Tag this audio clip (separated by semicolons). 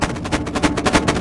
glitch; idm; reaktor